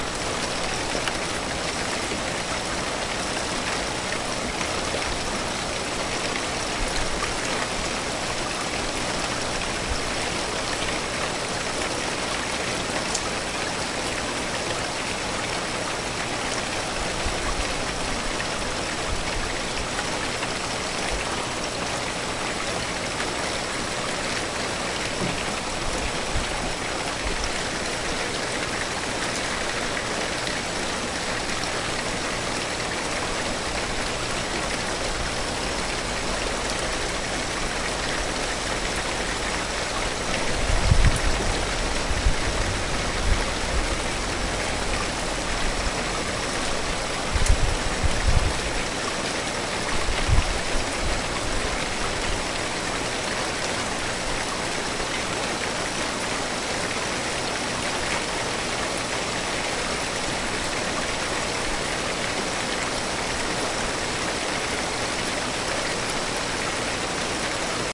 This is a short sample I recorded on my Speed HD-8TZ camcorder.
To record this I opened my bedroom window and didn't set anything up, I didn't have any professional equipment, just the rain and my camcorder. I hope it's OK.